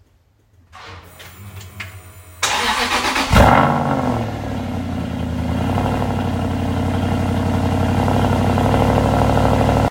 Aston Martin V8 Vantage start-up
Cold start-up sound of an Aston Martin V8 Vantage MY2009.
Captured using and iPhone 11 Pro
Aston-Martin, automobile, car, ignition, engine, vehicle, start, motor